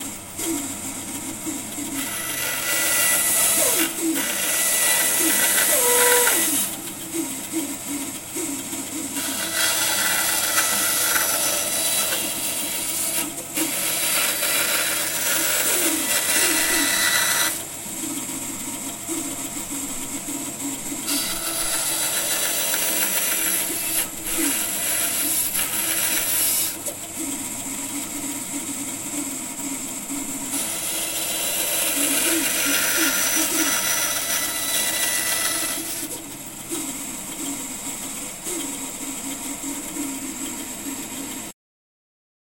MACHINE-BAND SAW-Sawing hardboard with a band saw, model Record Power BS250-0002
Pack of power tools recorded in carpenter's workshop in Savijärvi, Tavastia Proper. Zoom H4n.
electric, hardboard, band